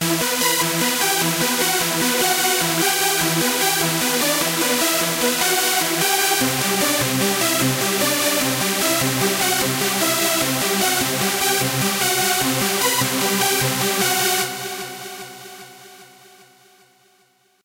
Advanced Hardstyle Melody
Here is an advanced melody for you! I will be using this for my own track so remember that. Look me up and hear my stuff!
Made in Logic X with Sylenth1